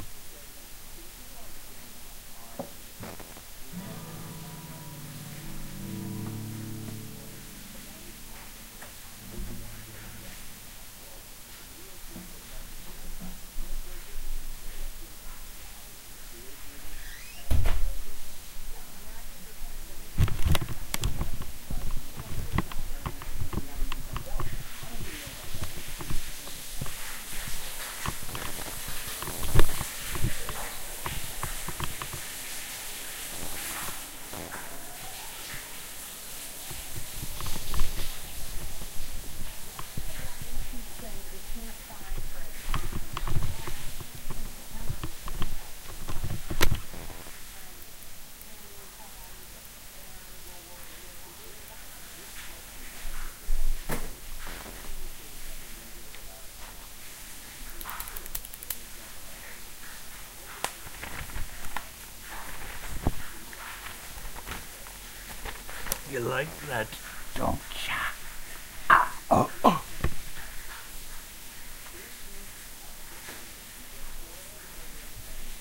A recording of a guitar chord, door, shower, words, grunting, rattling stuff etc. You can use this for any type of music or movie (war, love, comedy, etc)
ambience, stereo, guitar, room, shower, door